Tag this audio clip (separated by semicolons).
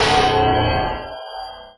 processed; beat